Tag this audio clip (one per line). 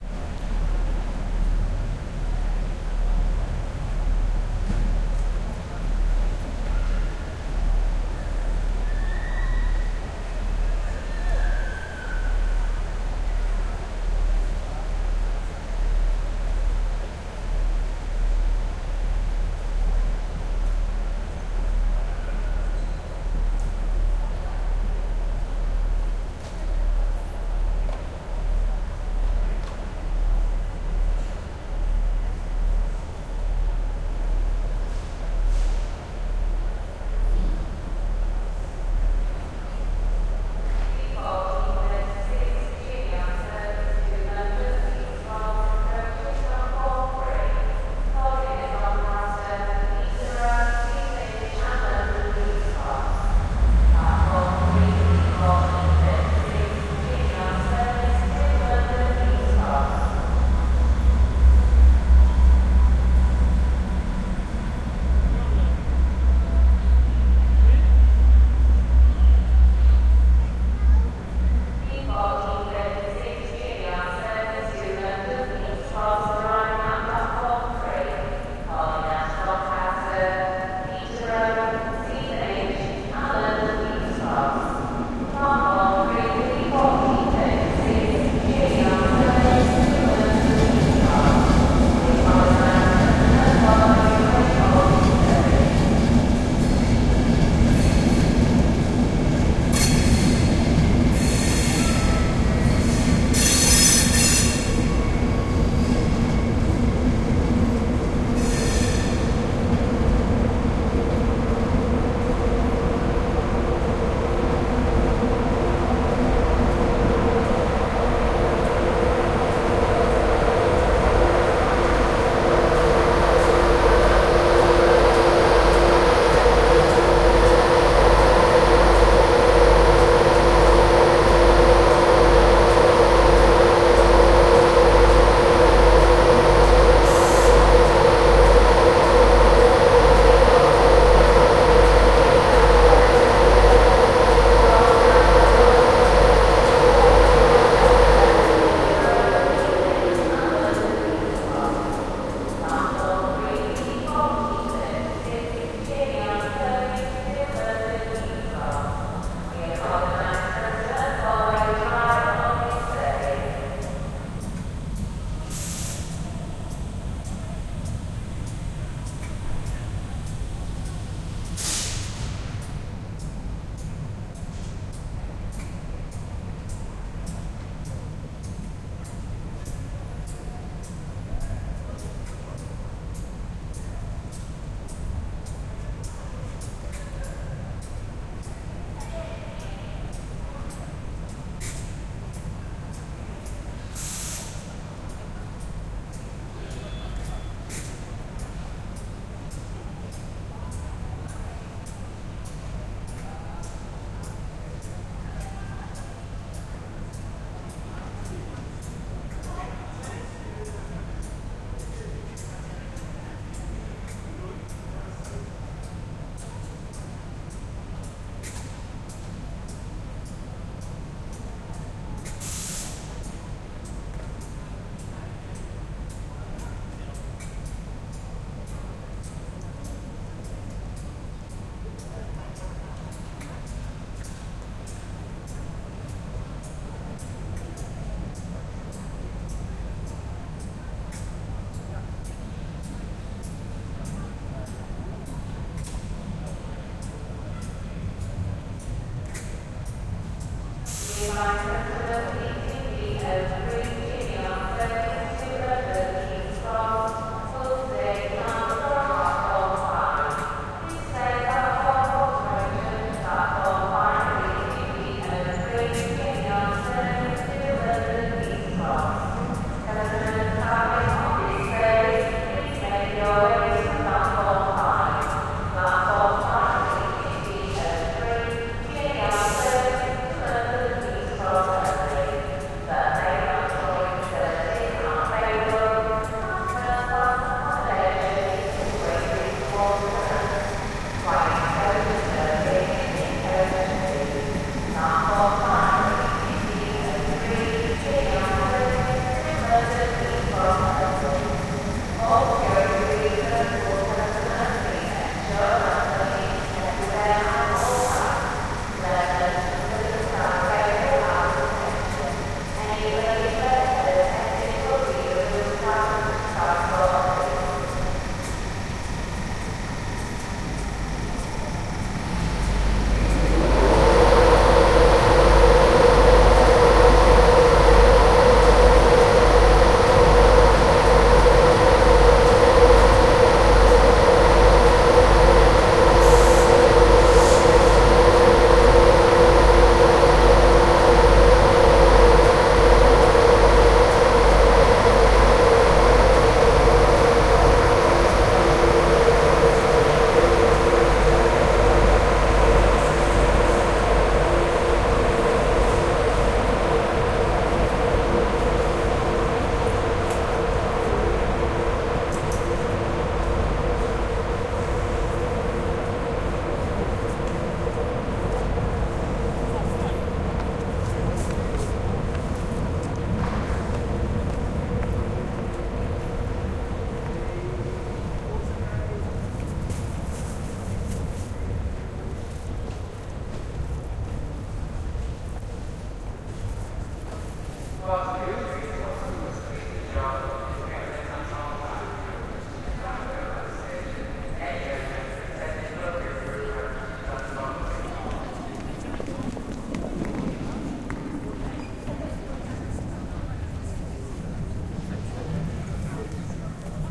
station
train